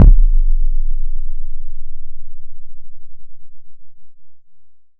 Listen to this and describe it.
A really rough, mono kick with too long of a tail for my use, so, here I go submitting it for posterity. Created using freeware with no processing done whatsoever.